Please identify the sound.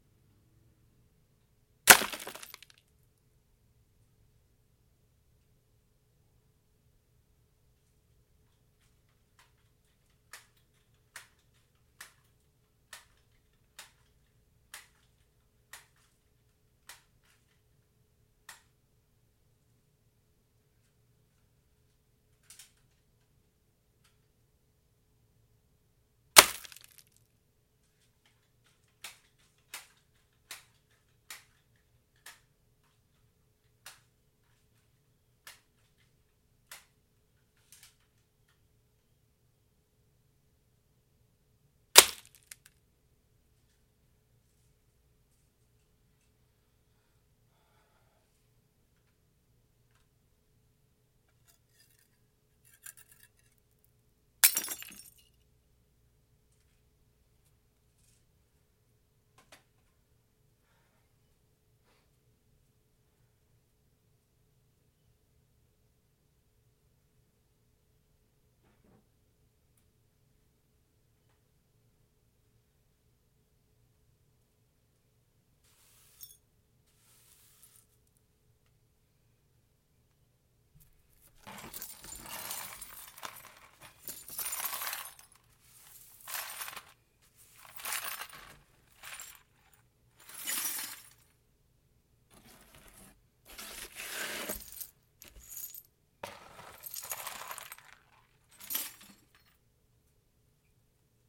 Incandescent lightbulb meeting it's end from 30 feet away by a 66 Powermaster air rifle unloading 5.4mm steel ball bearings from hell.